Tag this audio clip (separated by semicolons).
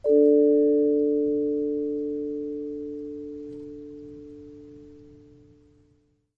percussion; chord; vibraphone